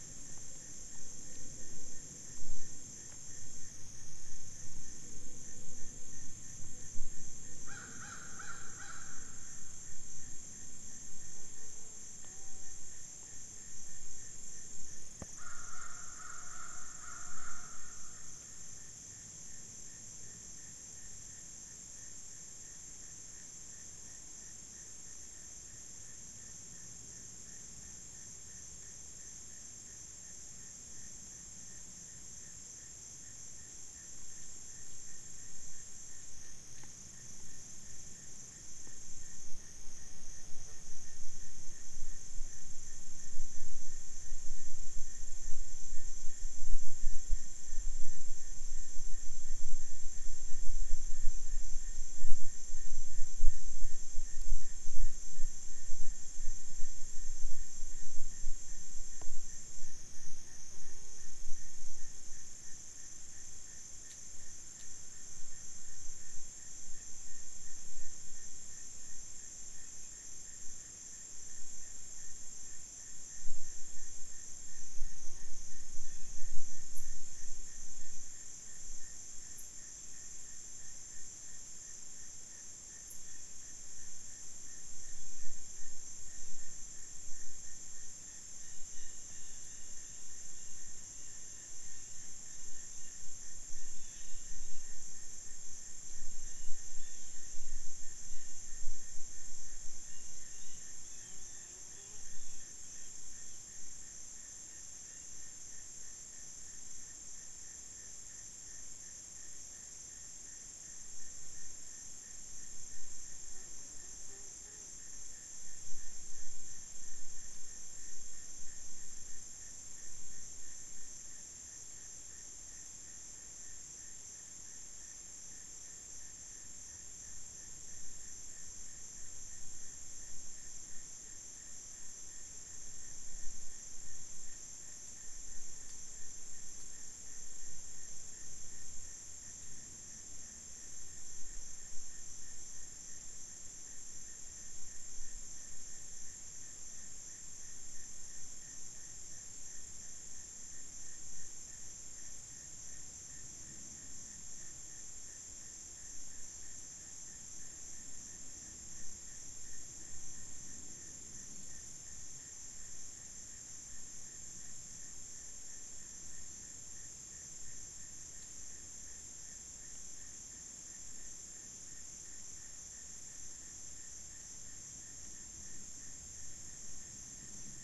Forest Morning #1
Stereo field recording taken at 6AM deep in the woods of rural North Carolina on the eastern seaboard of the United States. Largely free of human sounds.
ambiance, ambience, birds, breeze, field-recording, insects, nature